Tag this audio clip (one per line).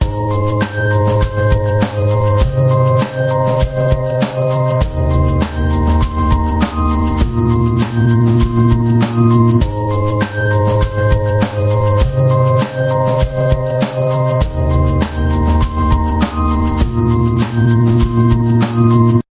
percussion
chill
loop
calm
ambient
synths
Relaxing